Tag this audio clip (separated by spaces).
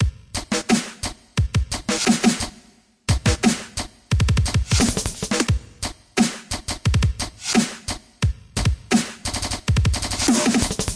Drums Fills Kick Hats Snare Koan